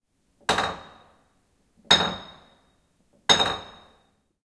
Raw sound of a bowl hitting the kitchen countertop. Various takes captured in a middle size kitchen (some reverb) with zoom H4n. Normalized/render in Reaper.